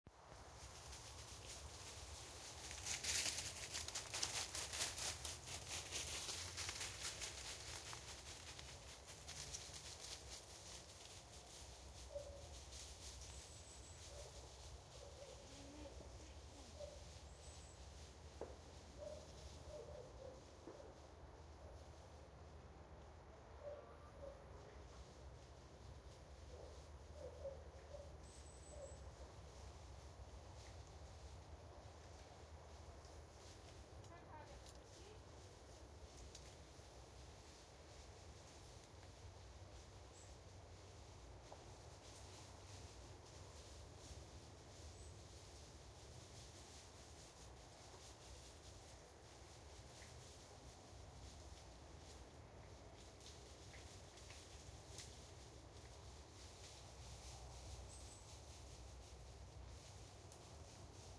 Counrtyside - sunny 2019-12-07 14-56-21
Dog passing by, country side field recording, sunny day
field, dog, sunny, recording, ambient